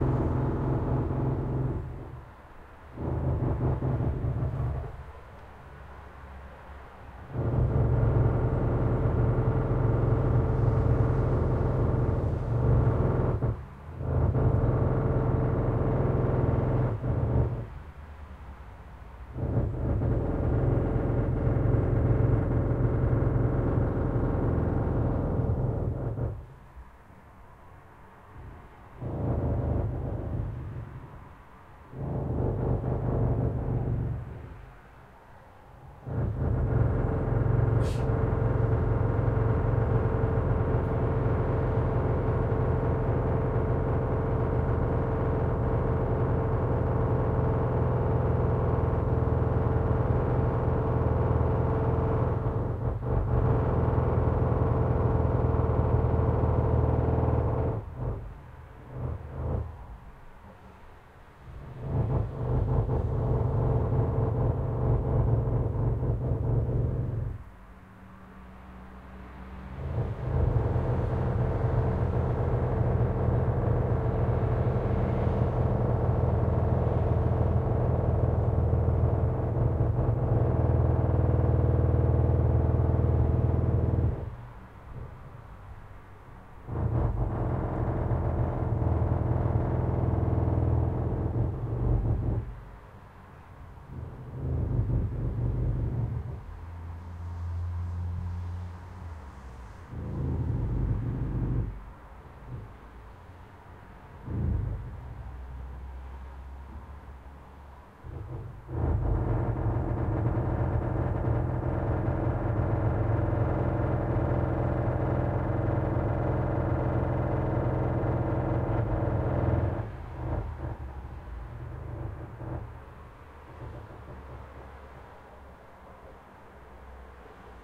Neighbours drilling wall.
Recorder: Tascam DR-40.
Date: 2015-03-18.